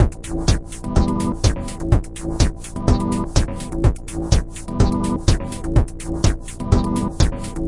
Drums loop Massive HouseInCity125BPM
125bpm, drums, groove, loop